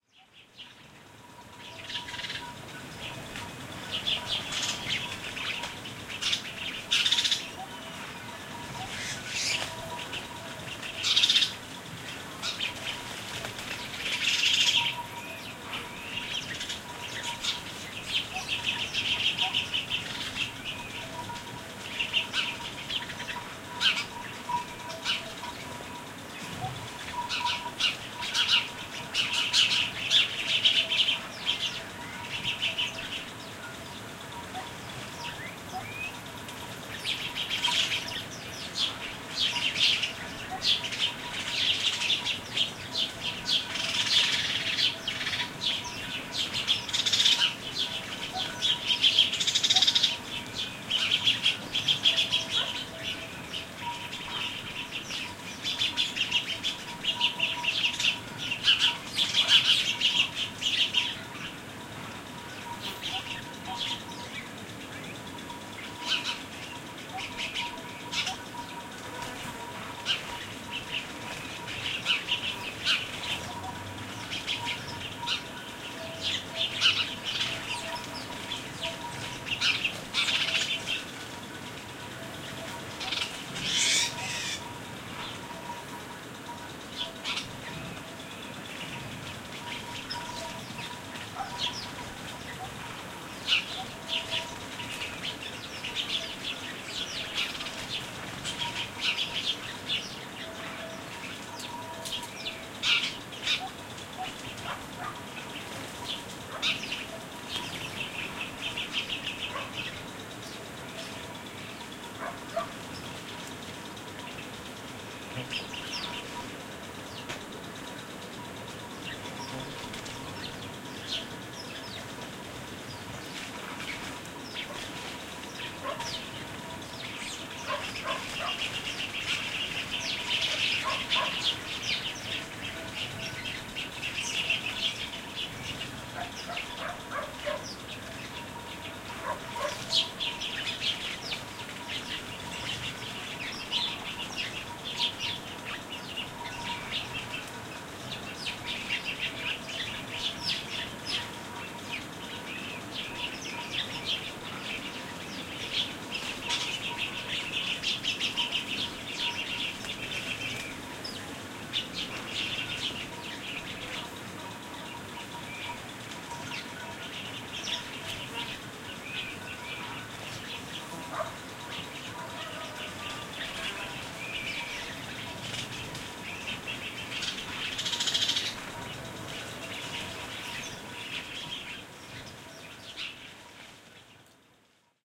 20160719 afternoon.rural.54
Afternoon ambiance in an orchard at a central Spanish country house: birds chirping and fluttering (mostly House Sparrow), sheep bells in background. Recorded near Madrigal de la Vera (Cáceres Province, Spain) using Audiotechnica BP4025 > Shure FP24 preamp > Tascam DR-60D MkII recorder.
orchard, field-recording, summer, bells